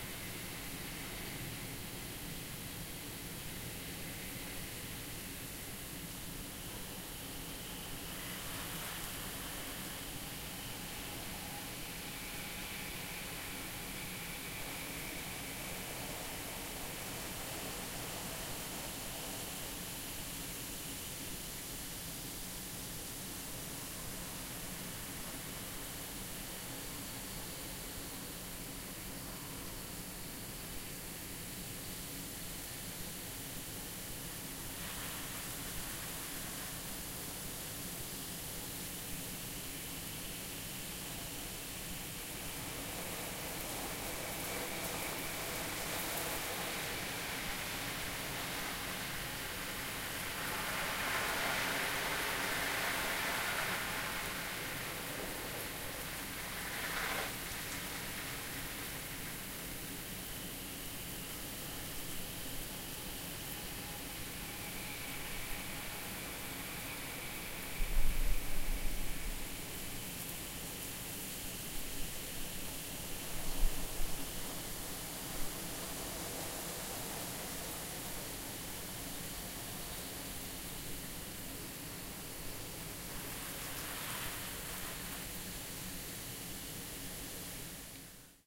crickets sprinklers
A recording of the night ambiance at Villa Bella, Boliqueime, Portugal on 1 October 2014. Crickets, sprinklers, the odd back ground further, hope you enjoy.
cricket, night, field-recording, sound, summer, Villa, Bella, Portugal, crickets